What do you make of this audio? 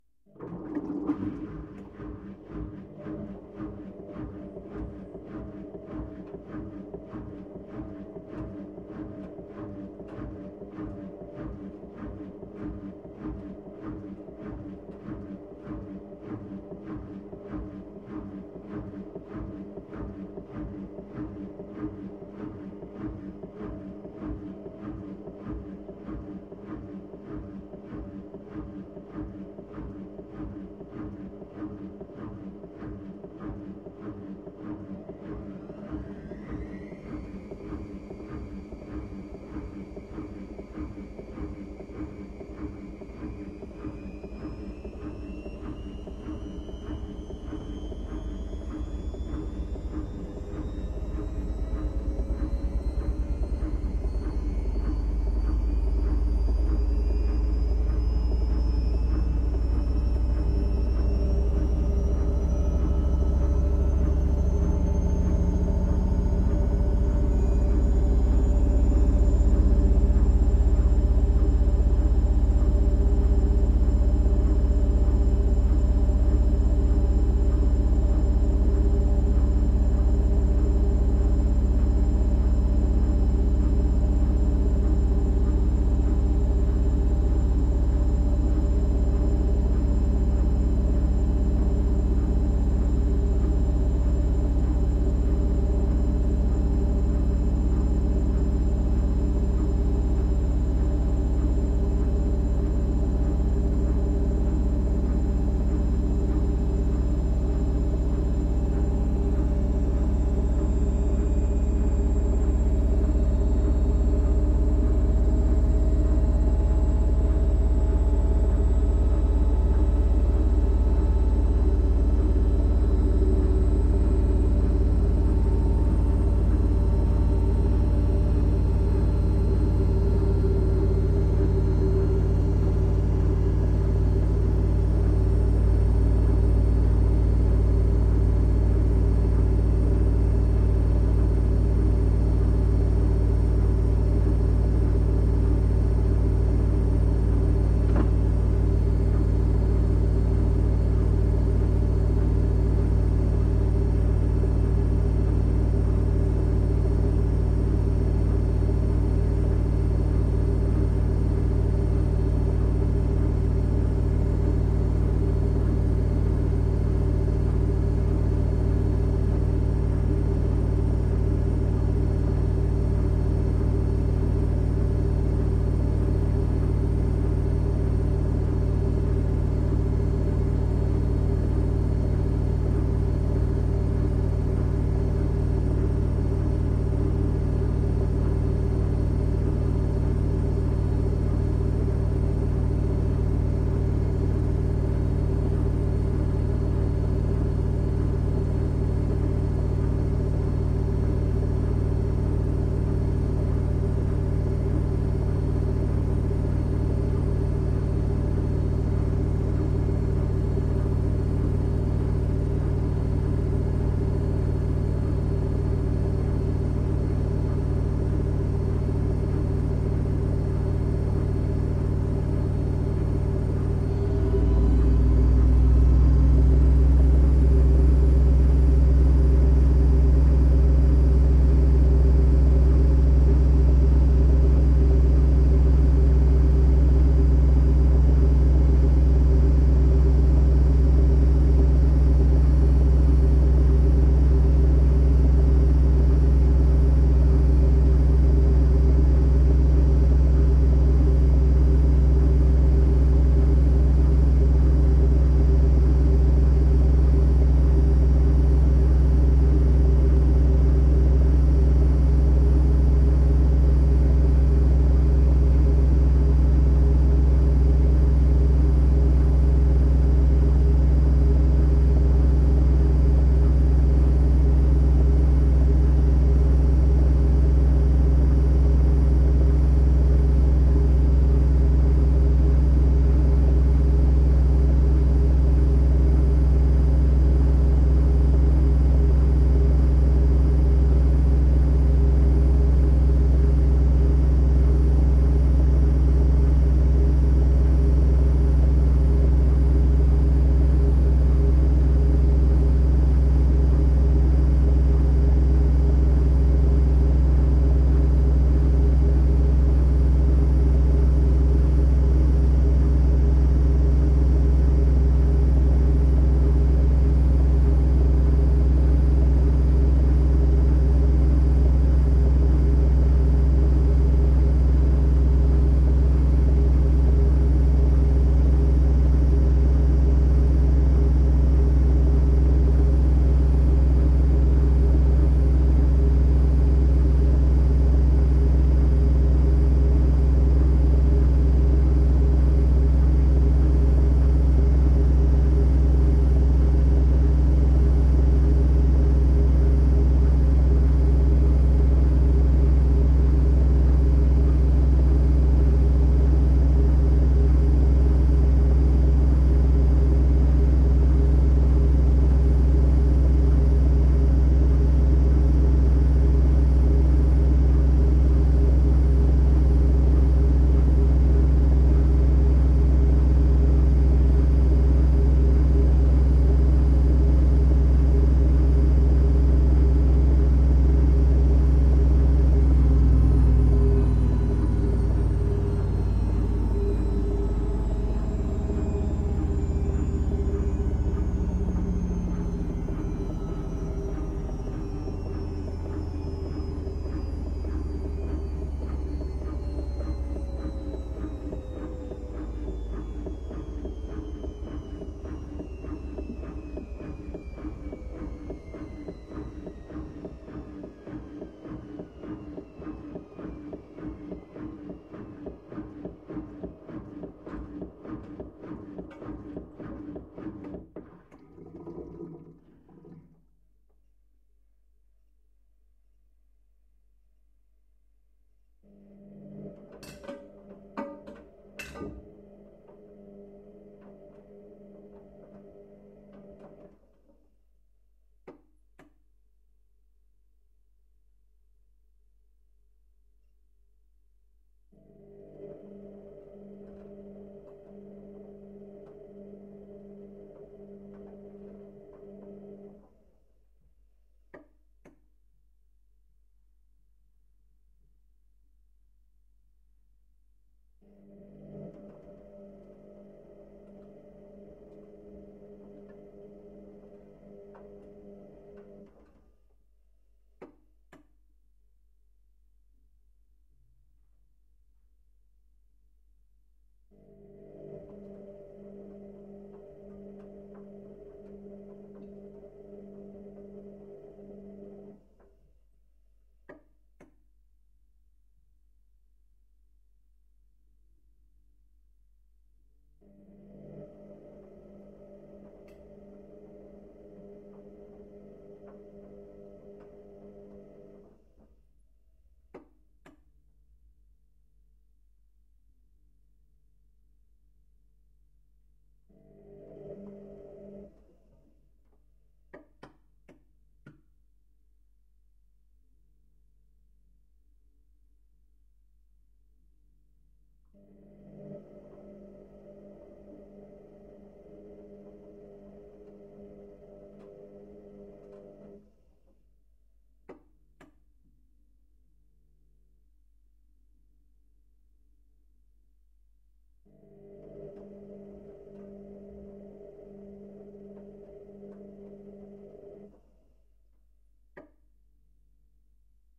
HOME WASHING MACHINE SPINDRYING
Washing Machine with a spin-drying washing program. Recorded with an AKG C214, Sound Devices 302 and an Olympus LS100.
dry, home, household, machine, washing